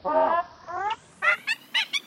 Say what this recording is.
samples in this pack are fragments of real animals (mostly birds)sometimes with an effect added, sometimes as they were originally